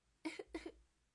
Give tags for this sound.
enfermo; tos; toser

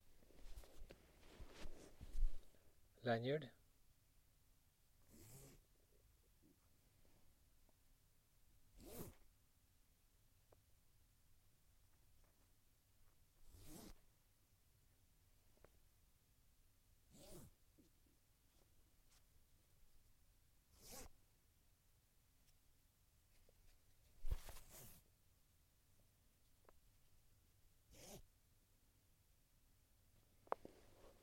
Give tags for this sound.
foley; nylon; string

